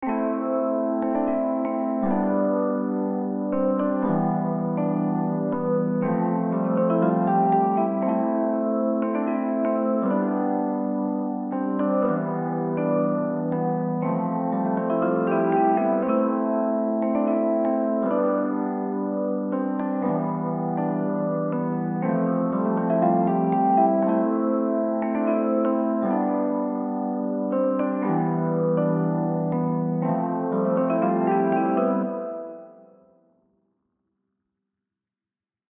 migraine- epiano riff 2
Chords are Am, G, Em, F. 120 bpm.
Advanced, Bells, Chill, Chords, Easy-listening, Electric, E-Piano, Full, House, Lo-Fi, Piano, Preset, Progression, Riff, Soft, Tempo